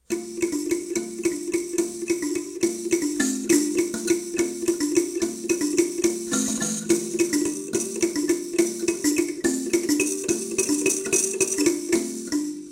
Playing an african kalimba, or mbira from Tanzania by picking pieces of steal stripes, fixed on a wooden box. Vivanco EM34 Marantz PMD 671.
mbira, kalimba, percussion, rhythm, africa